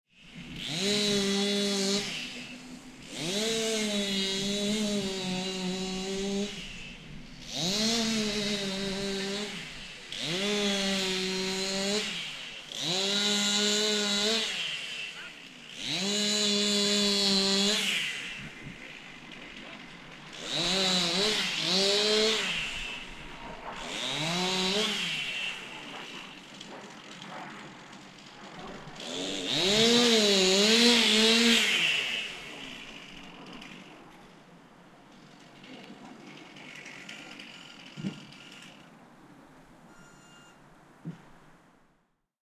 Audio of a large tree branch being sawed with a chainsaw near the University of Surrey lake. I have reduced frequencies below 100Hz due to wind interference. The recorder was approximately 20 meters from the chainsaw.
An example of how you might credit is by putting this in the description/credits:
The sound was recorded using a "Zoom H6 (XY) recorder" on 9th February 2018.